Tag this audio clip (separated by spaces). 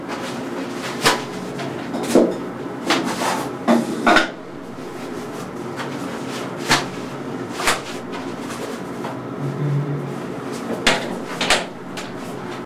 cleaning basement bassment set-noise noise washing cellar laundry bath bathroom